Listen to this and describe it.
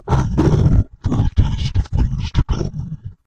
nightmare of christmas future
a neet sound I made by editing my dad talking.
Nightmare, things, comming, future, christmass